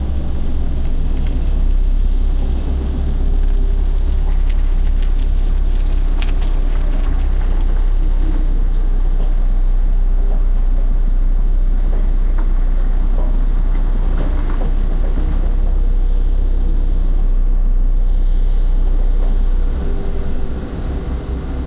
Construction site, landfill 03
Recorded using small stereo omnis.
Cut in Cubase.
area, dump, digger, lot, destruction, landfill, noise, ecocide, contract, damaged, waste, ambient, environmental, field-recording, construction, dredger, garbage, building, excavator, destroying, yard, background, engine, ecological, site, damage